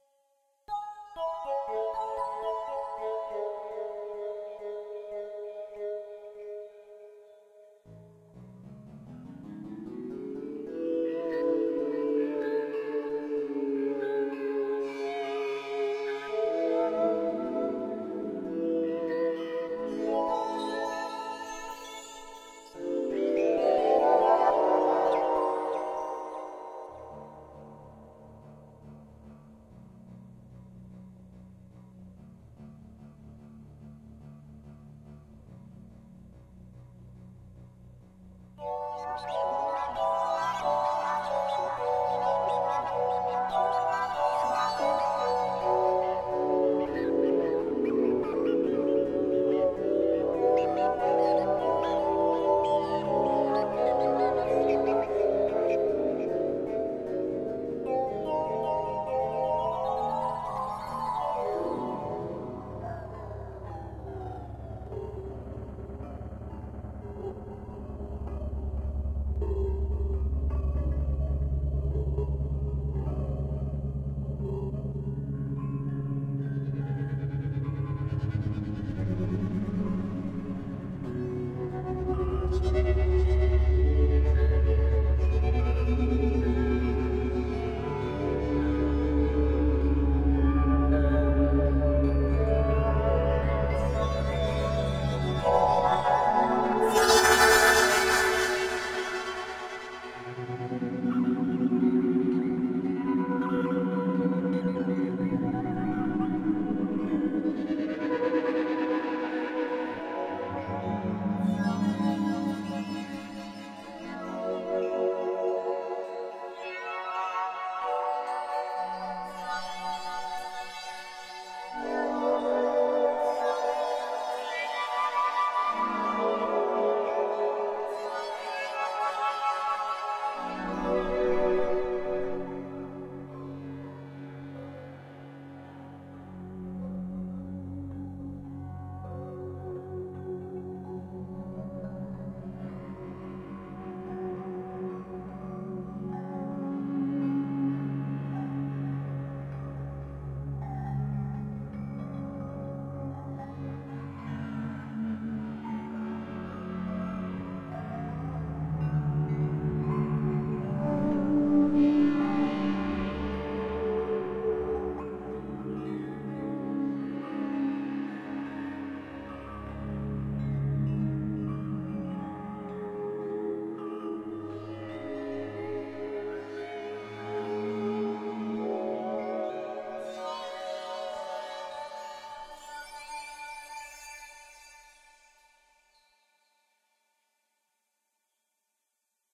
Soundscape Destiny
Made with Roland Jupiter 80.
synth, atmospheric, soundscape